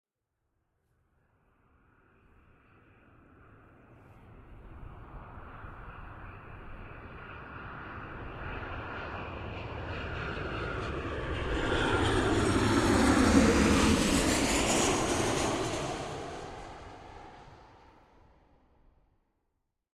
Landing Jet 2
Civil airliner landing.